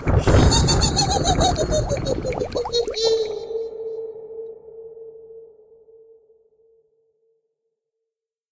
This is my Wife doing her best WITCHY laugh for Halloween, along with a clap of thunder and a boiling witches brew.